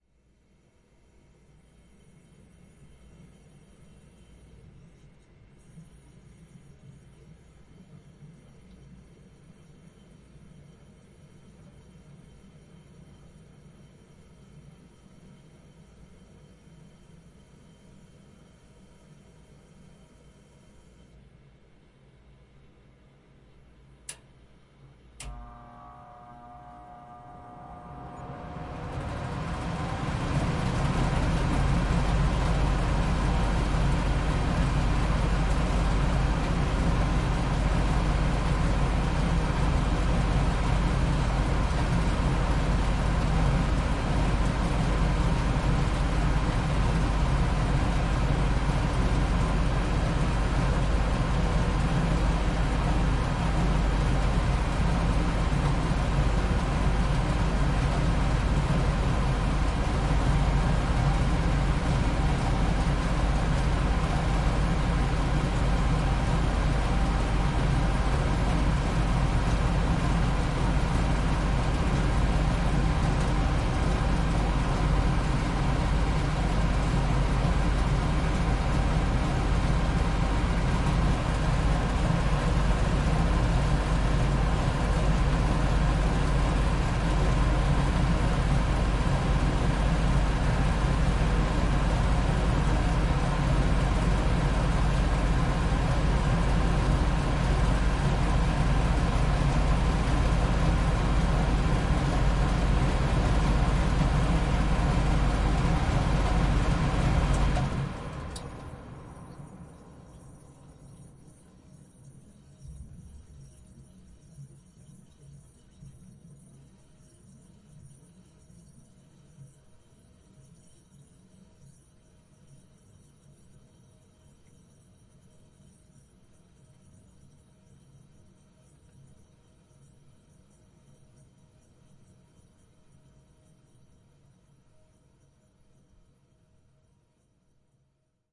Air Conditioning Unit 6-8-14
Recorded my air conditioner with my Zoom H4n. Turns on then turns off with a few clicks. I recorded it about 5 inches away, holding the recorder inside the closet where my A/C is stored. Built-in mics were set at a 120 degree XY array.
Air-Conditioner, home, background-sound, hum, rattle, heater, room-noise, mechanical, machine, house